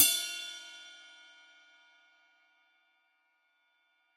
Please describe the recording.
ChH18x20-1-BlO~v08
A 1-shot sample taken of a special-effects HiHat cymbal combo stack (an 18-inch Zildjian A series Ping Ride as the top cymbal, and a 20-inch Wuhan Lion series China as the bottom cymbal), recorded with an MXL 603 close-mic and two Peavey electret condenser microphones in an XY pair. The files designated "FtSpl", "HO", "SO", and "O" are all 200,000 samples in length, and crossfade-looped with the loop range [150,000...199,999]. Just enable looping, set the sample player's sustain parameter to 0% and use the decay and/or release parameter to fade the cymbals out to taste. A MIDI continuous-control number can be designated to modulate Amplitude Envelope Decay and/or Release parameters, as well as selection of the MIDI key to be triggered, corresponding to the strike zone/openness level of the instrument in appropriate hardware or software devices.
Notes for samples in this pack:
Playing style:
Cymbal strike types:
Bl = Bell Strike
Bw = Bow Strike
E = Edge Strike
cymbal, hi-hat, multisample, velocity, 1-shot